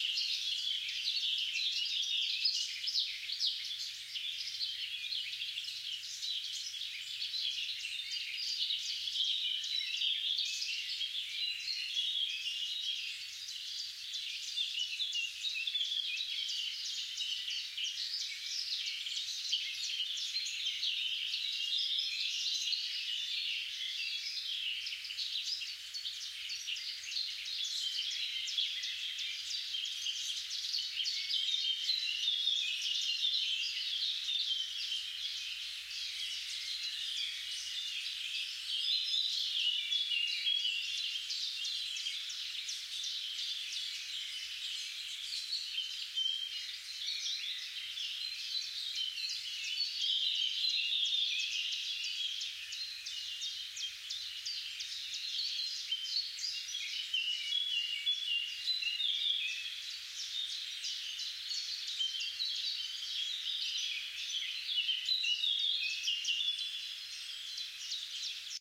Singing birds in a forest on a sunny day. Recorded with a ZOOM H2n.
sunshine, Nature, Birds, animals, Field-recording, Forest, tweet